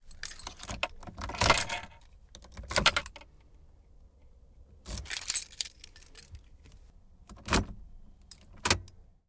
Car keys-enter-exit-ignition
Car keys insert into ignition, and keys withdrawn from ignition